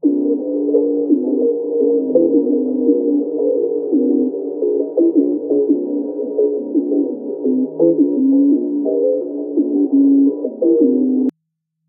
cloudcycle-cloudmammut.09
divine, space